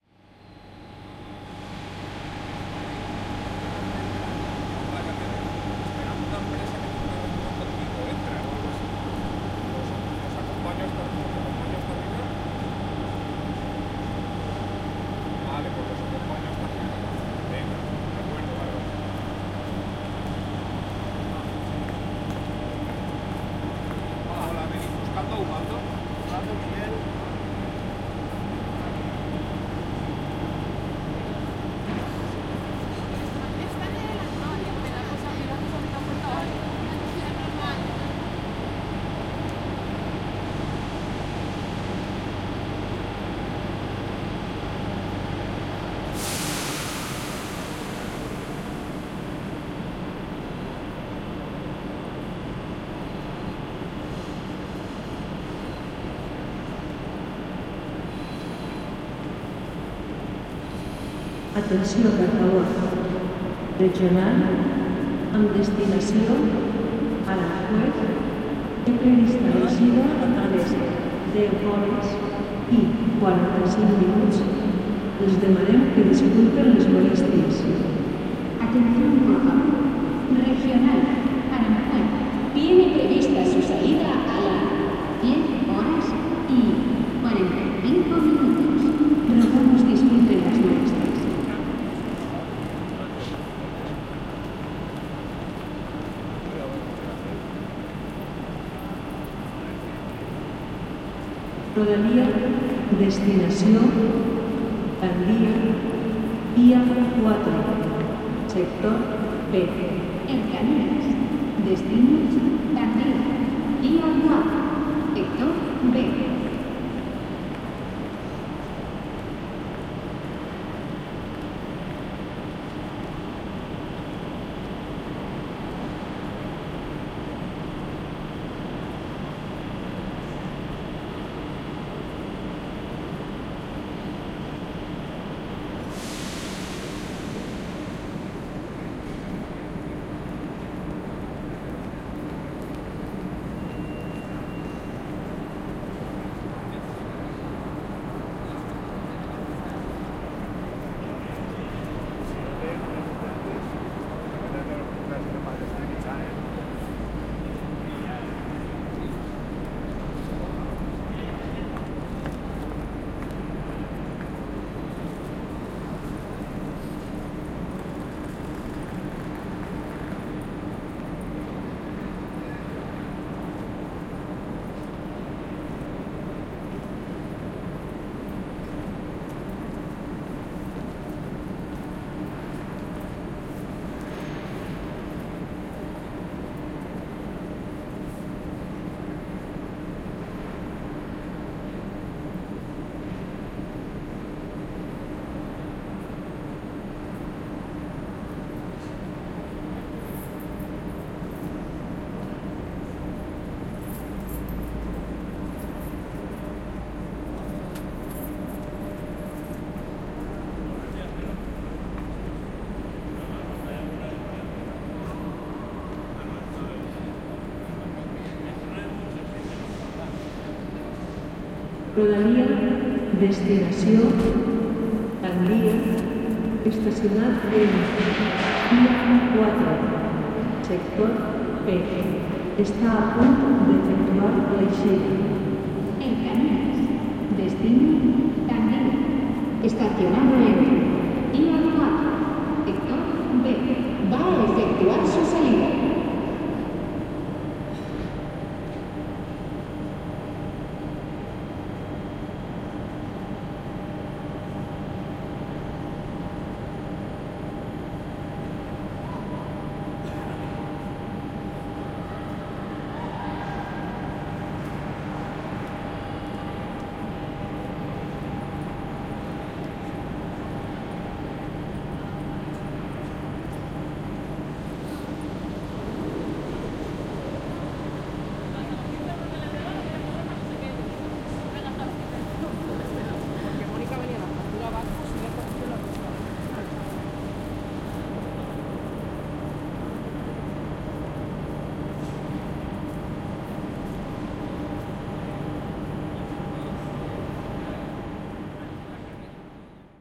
Sound of trains in the station